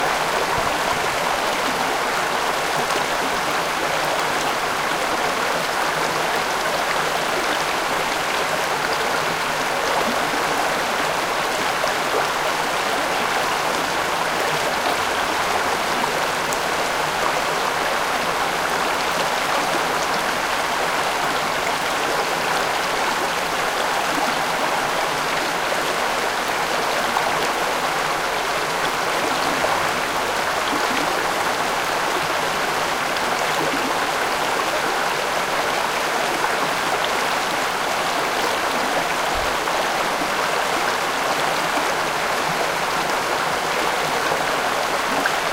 Lithia Creek 05
Running water in Lithia Creek, running through Ashland, OR, USA. Recorded August 1, 2008 using a Sony PCM-D50 hand-held recorder with built-in microphones. Nice range of natural water noise.
ambient, built-in-mic, field-recording, microphone, PCM-D50, running-water, Sony, splash, trickle, urban, water, wikiGong